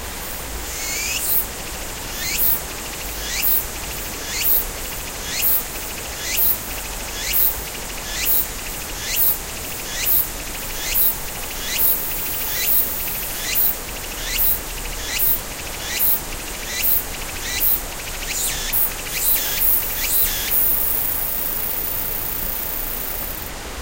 summer hill1
brook, cicada, field-recording, flowing, forest, Japan, Japanese, mountain, stream, summer, water